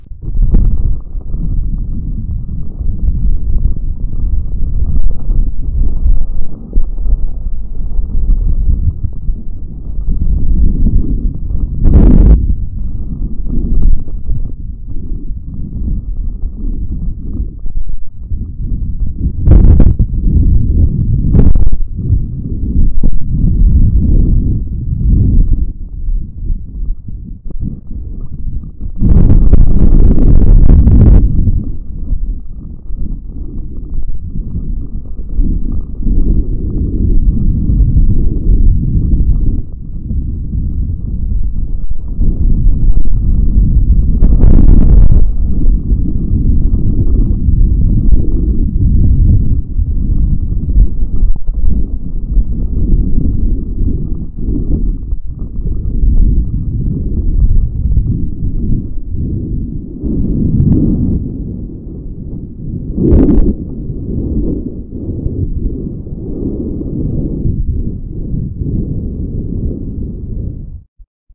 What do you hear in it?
Faked sound with a person with microphone standing close to the crater. It is about a volcano that has frequent mini eruptions, but enough sound to make the decibel meter pass 130 dB. Volcano eruptions like in the photo are characterized by a constant bombardment of lava that is thrown hundreds of meters, simultaneously with low frequency boooms. As lots of gas is pressed out through so called blow-holes, there is a constant background hiss. It's only madmen who are busy in this class of geophysists.
bio-geo, field-recording, nature, outdoors, sounds